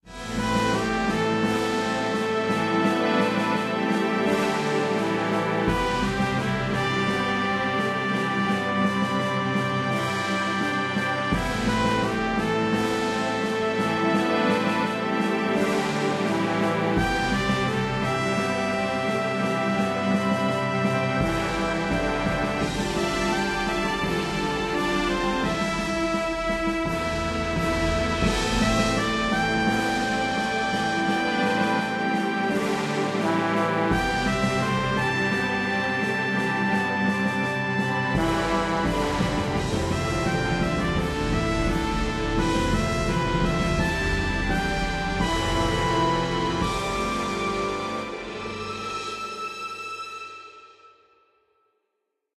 A cinematic orchestral piece fitting for as a hero's theme.